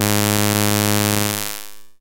This sample is part of the "Basic impulse wave 1" sample pack. It is a
multisample to import into your favourite sampler. It is a basic
impulse waveform with some strange aliasing effects in the higher
frequencies. In the sample pack there are 16 samples evenly spread
across 5 octaves (C1 till C6). The note in the sample name (C, E or G#)
doesindicate the pitch of the sound. The sound was created with a
Theremin emulation ensemble from the user library of Reaktor. After that normalising and fades were applied within Cubase SX.